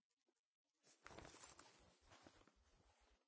Lifting Backpack No Reverb
Lifting backpack to shoulder in dull room – no reverb
backpack, lifting